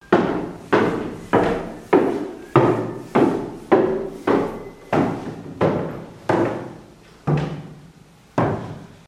passos na escada